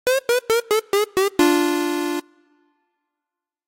Dead 8bit
Dead/dying sound. Modulated synth playing chromatic digital dying sound.
dying, retro, arcade, lo-fi, 8-bit, Dead, chiptune